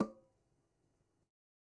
Metal Timbale closed 007
god, record, trash, home, conga, real, closed